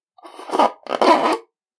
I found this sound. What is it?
Straw being inserted into a takeaway drink.